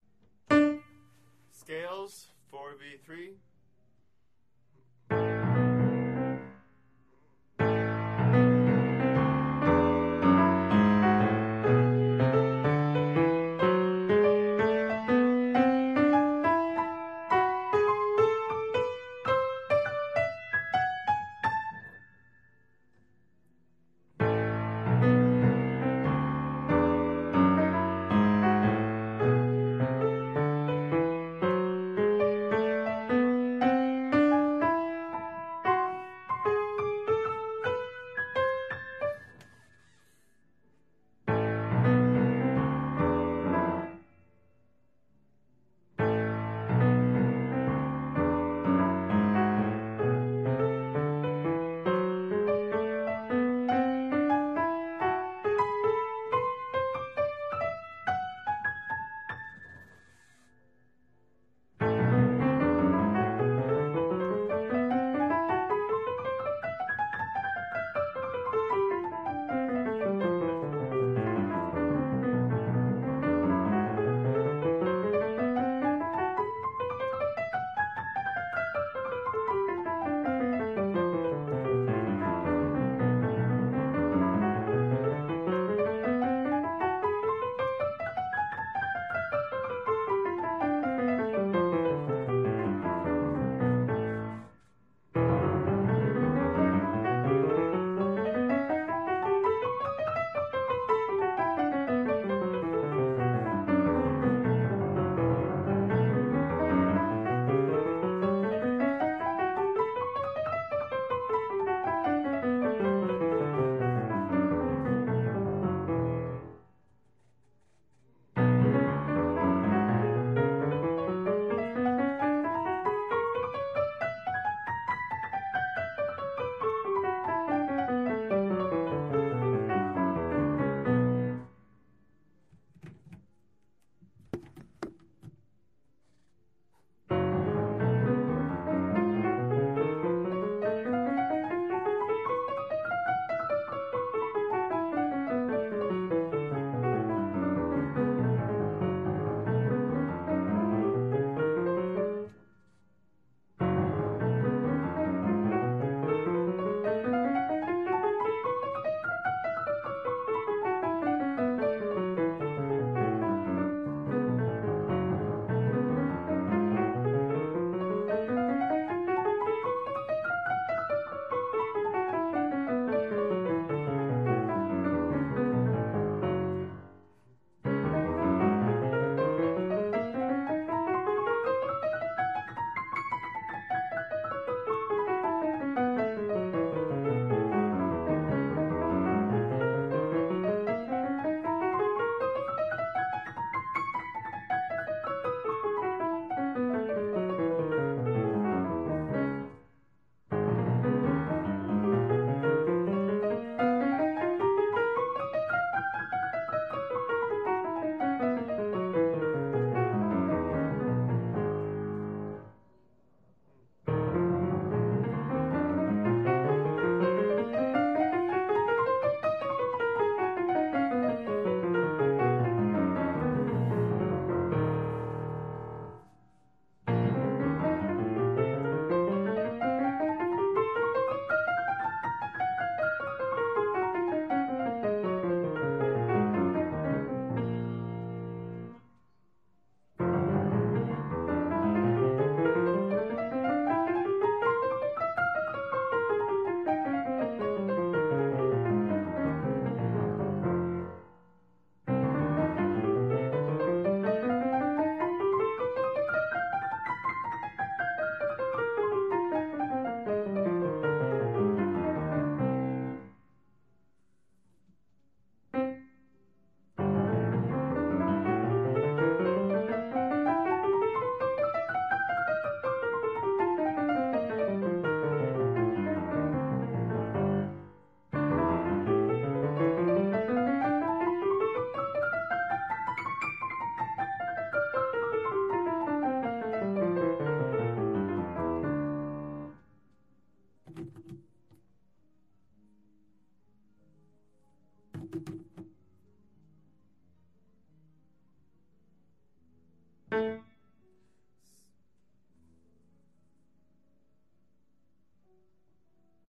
Practice Files from one day of Piano Practice (140502)
Piano, Practice